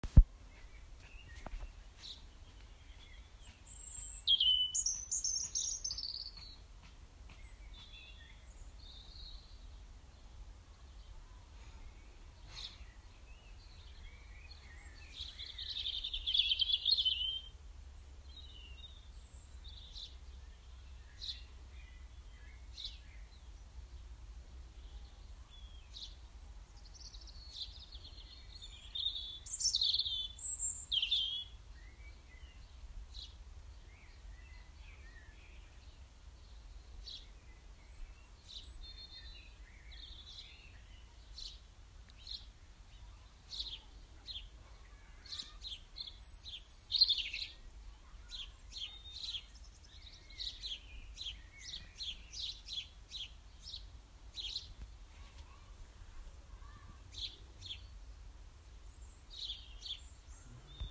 Cheshire Garden May 2020
Joyful birdsong in a cheshire garden during lockdown 2020
nature, lockdown, spring, birds, birdsong